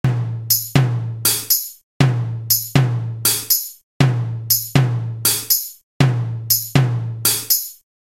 Rhythm loop made with Music Mania.
beat, drums, loop, music, rhythm